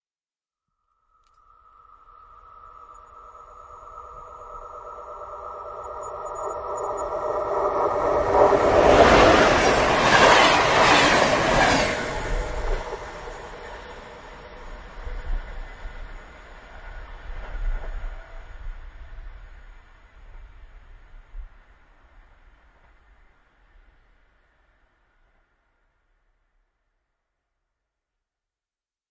rychlík 3 krátký
Express No.3, short